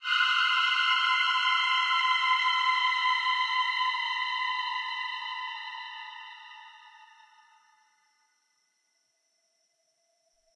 32930 Seagul 01 stretch 02
This is a stretched seagul cry that we have used in our collab piece.
It sounds like a pad with haunting vocal characteristics.
Stretched with Paulstretch. I seem to recall that the stretch parameter was something like 50-100 times.
Original seagull sound came from here:
collab-1, eerie, haunting, pad, scream